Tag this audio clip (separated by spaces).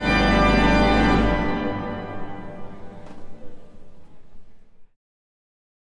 Ambience Atmosphere Cathedral Close-Miked Hall Large Music Organ